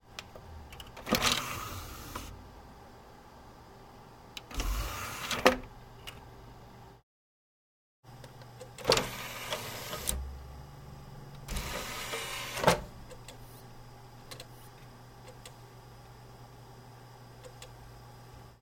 compCDandDVD OpenClose
Open and close CD tray (Asus CD-S520/A) and then open and close DVD tray (Optiarc DVD RW AD-7173A).
Computer noise on background.
operation-system, HD, Asus, hard-drive, DVD, Gagabyte, compact-disc, open, Zalman, CD, tray, PC, Optiarc, Linux, close-tray, close, open-tray, digital-versatile-disc, Intel, system, computer